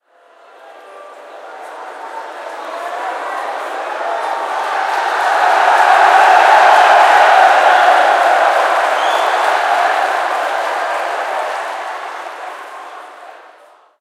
A sound of a cheering crowd, recorded with a Zoom H5.